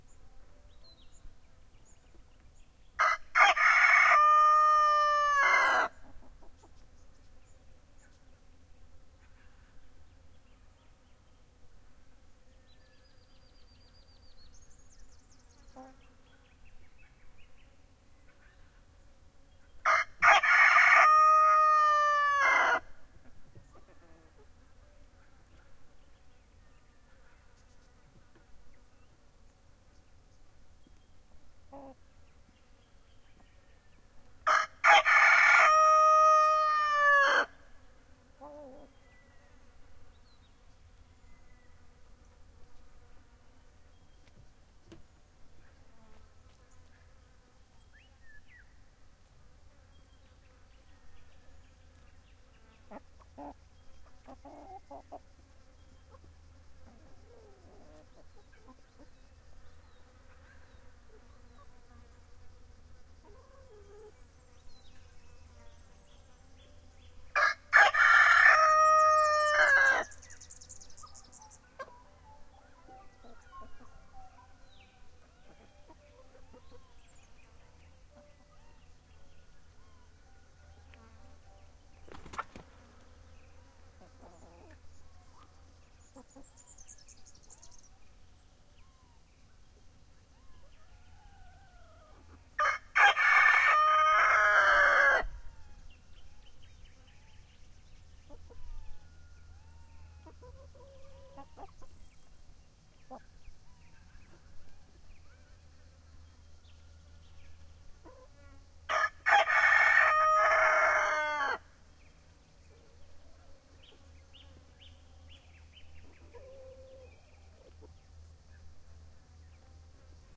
This is our rooster Faranelli.